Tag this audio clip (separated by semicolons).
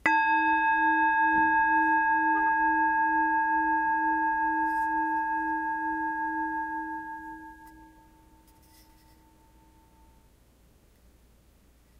bell; bowl; meditation; ring; ringong; singing; singing-bowl; tibetan